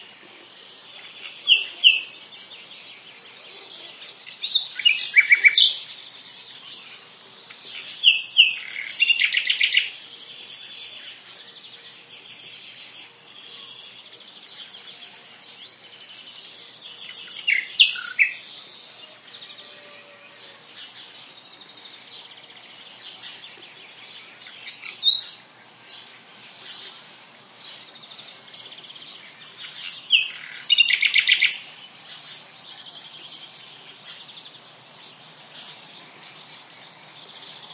20090502.nightingale.morning.01
close take of a Nightingale singing at Villa Maria (Carcabuey, S Spain), other birds in background. Sennheiser MH60 + MKH30 into Shure FP24 preamp, Edirol r09 recorder. Decoded to mid-side stereo with free Voxengo VST plugin
south-spain, field-recording, birds, nightingale, ambiance, spring, andalusia, nature, countryside